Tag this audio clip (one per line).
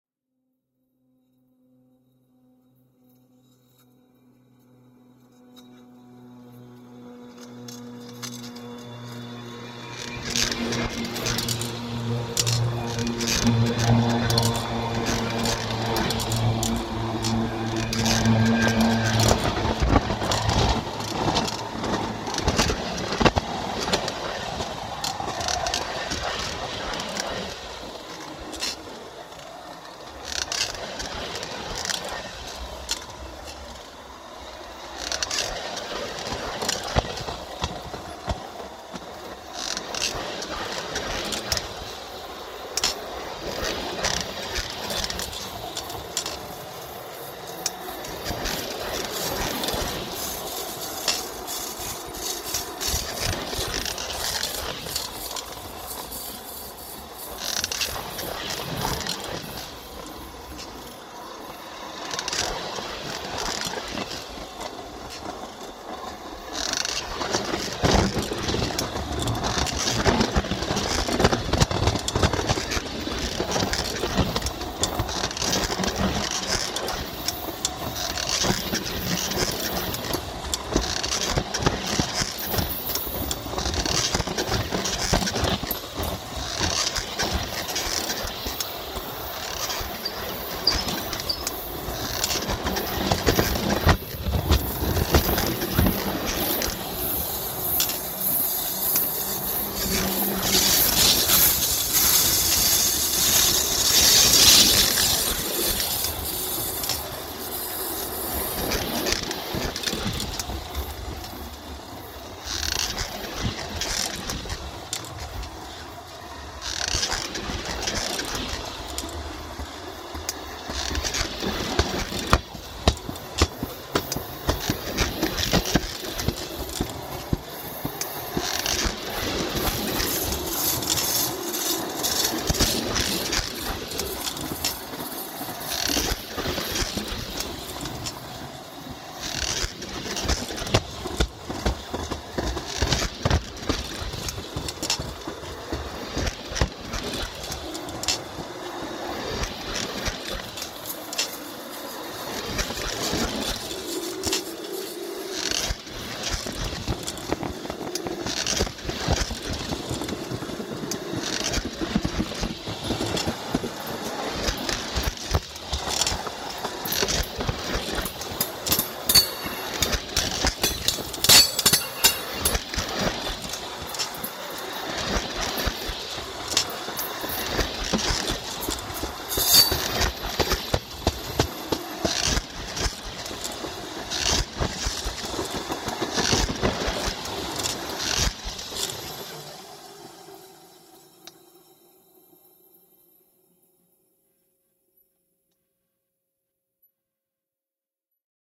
Contact-mic
Freight
Piezo
Rail
Sound-design
Train